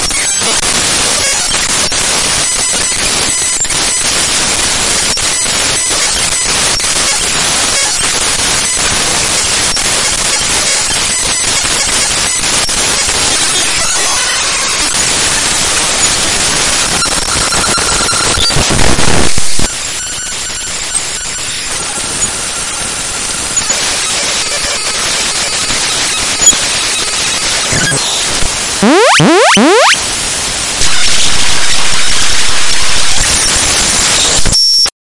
Raw Data 27
Various computer programs, images and dll/exe files opened as Raw Data in Audacity.
data, static, electronic, computer, sound-experiment, glitch, raw, annoying, noise, raw-data, processed, audacity